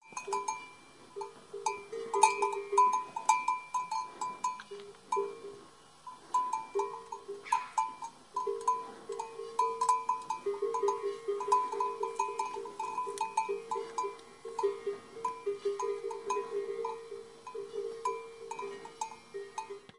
A calf in the high pastures of the Picos de Europa, northwest Spain. Includes a single bird call at about 9". Recorded with a mini-DV camcorder built-in stereo mic.